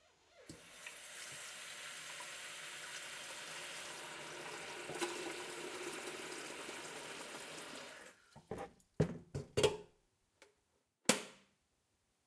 Tap Filling Kettle
A kettle being filled under a kitchen tap. At the end you hear the top of the kettle being closed.